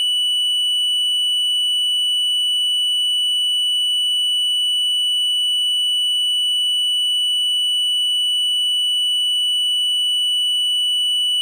Doepfer A-110-1 VCO Sine - F#7
Sample of the Doepfer A-110-1 sine output.
Captured using a RME Babyface and Cubase.
basic-waveform, multi-sample, analog, synthesizer, raw, wave, oscillator, A-110-1, modular, VCO, A-100, waveform, sample, Eurorack, analogue, sine-wave, sine, electronic